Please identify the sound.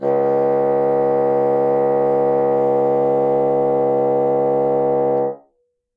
One-shot from Versilian Studios Chamber Orchestra 2: Community Edition sampling project.
Instrument family: Woodwinds
Instrument: Bassoon
Articulation: sustain
Note: C#2
Midi note: 37
Midi velocity (center): 95
Microphone: 2x Rode NT1-A
Performer: P. Sauter